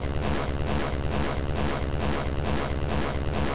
135 Grobler Synth 07
hard club synth